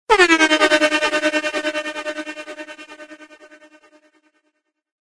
If you like this and need more dancehall airhorn sounds, you can also check out the full sample pack here: